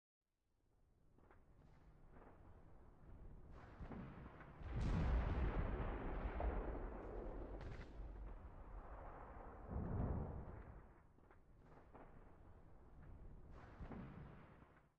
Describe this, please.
01 disparos fondo
disparos y explociones de fondo.
army, gun, military, projectile, rifle, shooting, shot, war, warfare